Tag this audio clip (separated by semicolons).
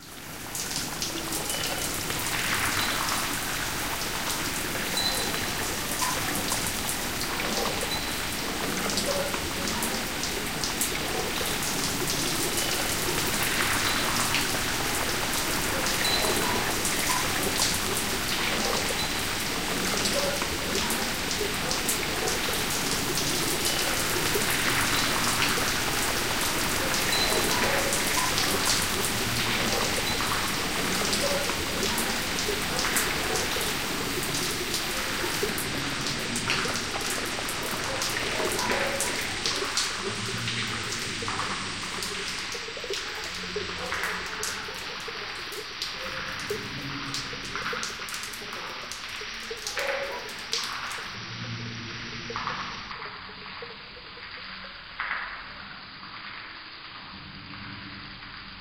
ambience; city; organic; rain; raindrops; weather; wet